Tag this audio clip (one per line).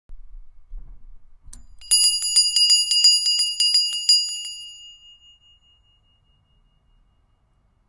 ringing
ring